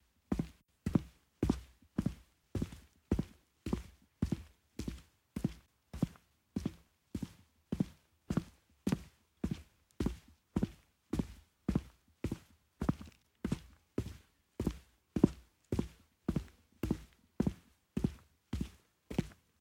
footsteps-wood-bridge-01-walking
bridge, field-recording, footsteps, wood